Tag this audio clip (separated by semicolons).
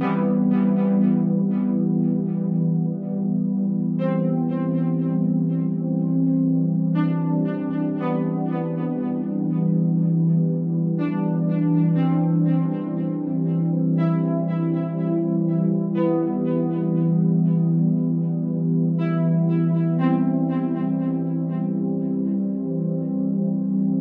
drone; electronica; lead; melodic; synth